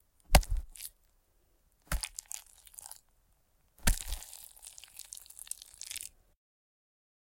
Squish from a pot of overcooked rice and a spoon.
Foley, Slosh, Squish, Wet